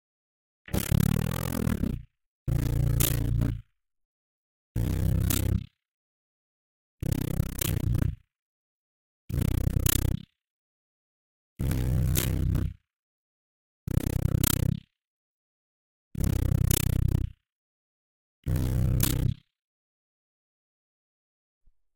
Wide growling reese
Weird sounding and really wide growl-ish thing
bass, distorted